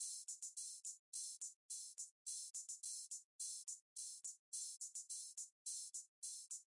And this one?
On Rd bruce Hats
8 bar loop used in our On Road Bruce project. Mixed nicely and can be used in various styles and genres.